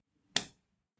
hit on head
me hitting my head
hand, head, hit, impact